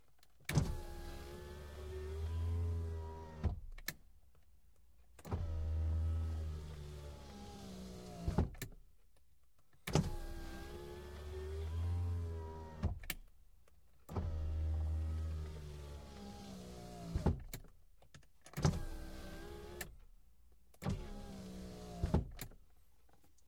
Clip featuring a Mercedes-Benz 190E-16V driver's side window being opened and closed. Recorded with a Rode NT1a in the passenger seat, where a listener's head would be.
dynamometer, dyno, vehicle, vroom